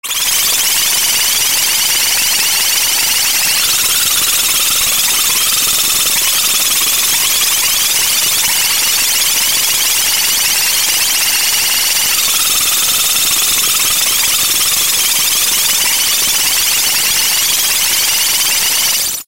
granular ambience 7 stardust attack
Created entirely with granulab, simulation of subatomic space particle sized piranhas attacking the outside of your reentry capsule.
ambient, artificial, free, granular, sample, sound, stereo, synthesis